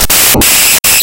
Very loud scary static
This is a horrible accident caused by putting an image file into Audacity. Don't ever, ever do that. I mean it. :P